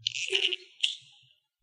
mola sfx
mola se esticando